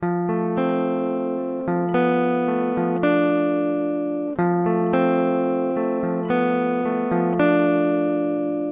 Guitar plucked sadly in F major, with the smallest piece of melody. No amp in this one, just my Rickenbacker.